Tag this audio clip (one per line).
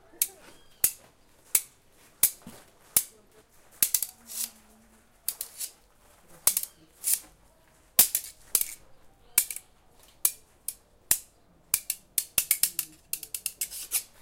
beating
cinema
ufpel
floor
metal
pelotas
bar